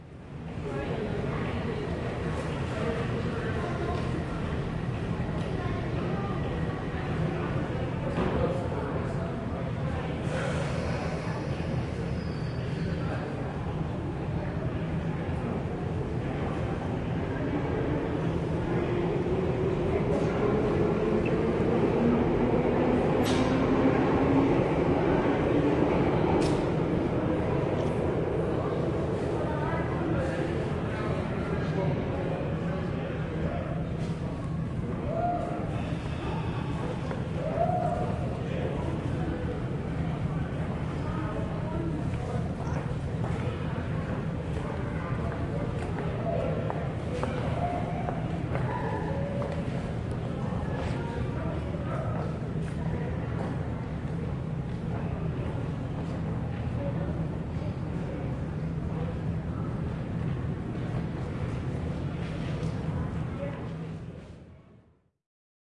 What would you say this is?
808 Kings Cross Underground 7
The sounds of an underground train station; passenger voices, a departing train, footsteps. Recorded in London Underground at Kings Cross station.
announcement, field-recording, london, london-underground, speech, tube, underground